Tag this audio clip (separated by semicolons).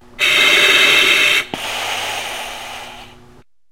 liking; gas